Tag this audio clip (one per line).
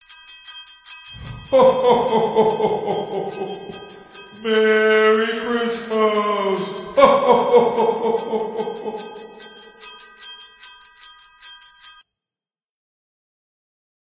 Christmas
hohoho